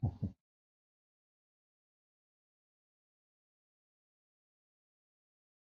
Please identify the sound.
sonidos para el final stems Corazón rápido
beat, rhythm